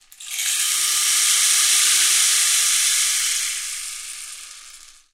Rainmaker 02 [RAW]
Simple recording of a long bamboo rain maker.
Captured in a regular living room using a Clippy Stereo EM172 microphone and a Zoom H5 recorder.
Minimal editing in ocenaudio.
Enjoy ;-)